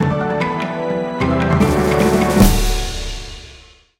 In the game Open Surge there is a logotype depicting the CC logo. This is a bit of music to acompany it.
commons, jingle, logo